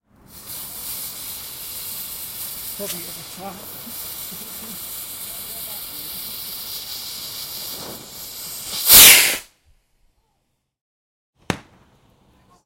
Recordings of some crap fireworks.